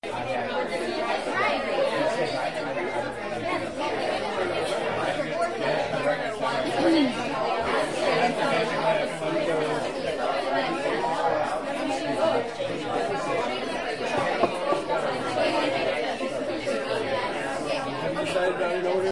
1040a-dinner at atlas
Noisy conversation in a crowded restaurant.
crowd, noise, noisy, restaurant, talking, voices